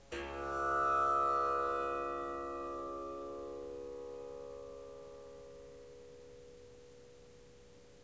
Tanpura note Low C sharp
Snippets from recordings of me playing the tanpura.
Tuned to C sharp, the notes from top to bottom are G sharp, A sharp, C sharp, Low C sharp.
In traditional Indian tuning the C sharp is the root note (first note in the scale) and referred to as Sa. The fifth note (G sharp in this scale) is referred to as Pa and the sixth note (A sharp) is Dha
The pack contains recordings of the more traditional Pa-sa-sa-sa type rythmns, as well as some experimenting with short bass lines, riffs and Slap Bass drones!
Before you say "A tanpura should not be played in such a way" please be comforted by the fact that this is not a traditional tanpura (and will never sound or be able to be played exactly like a traditional tanpura) It is part of the Swar Sangam, which combines the four drone strings of the tanpura with 15 harp strings. I am only playing the tanpura part in these recordings.
indian, bass, ethnic, tanbura, tanpura, swar-sangam, tanpuri